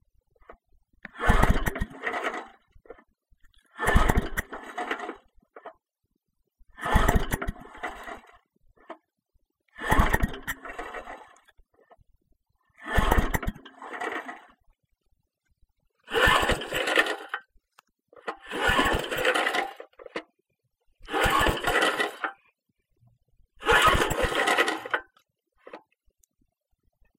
Trying to start a pushmower
Me 'trying' to start a lawnmower. (It was off anyway) Lots of thwops in there.
deck, lawnmower, pull, push, pushmower, start, try